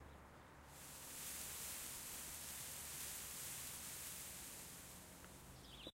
Leaves Rustling Edited
This is of leaves rustling on a tree due to a slight summer breeze.
Wind, OWI, Leaves, Blowing, Tree, Breeze, Nature, Summer, Relaxing, Leaf, Rustle, Trees, Rustling